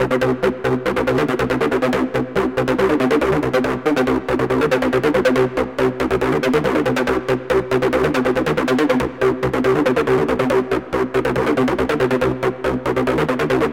This loop was created with FL-Studio 6 XXL.I Tried to make a "Blade-Theme" Style Sound.I Used the TS 404 for this.This sample can be looped, also good for adding filters and stuff!